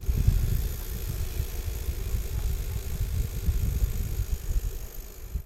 bike-chain 2
In this record you can hear the noise of the chain of one bicycle being pedaled. Is like a hum.
SonicEnsemble, bike, bicycle, chain